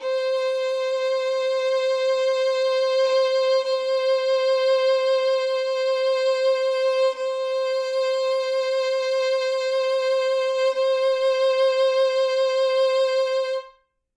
One-shot from Versilian Studios Chamber Orchestra 2: Community Edition sampling project.
Instrument family: Strings
Instrument: Solo Violin
Articulation: vibrato sustain
Note: C5
Midi note: 72
Midi velocity (center): 95
Room type: Livingroom
Microphone: 2x Rode NT1-A spaced pair
Performer: Lily Lyons